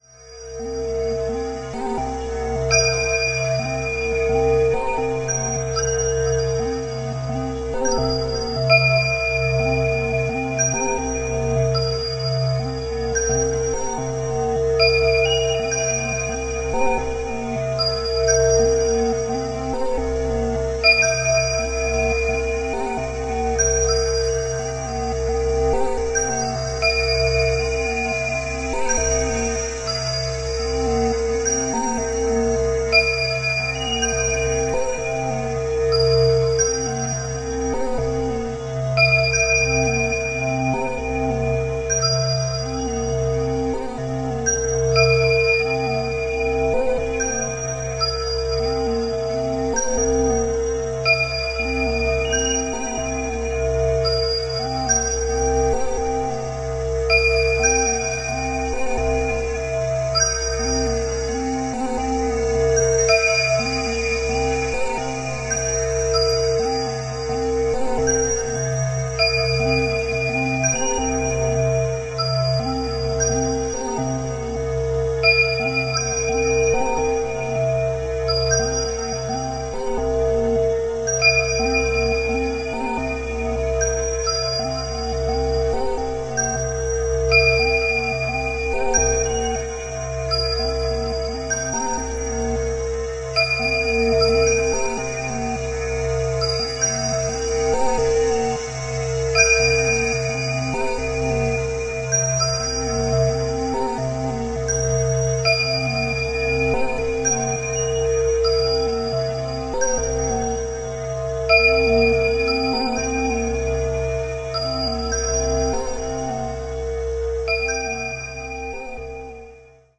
1.This sample is part of the "Padrones" sample pack. 2 minutes of pure ambient droning soundscape. Very meditative eastern atmosphere.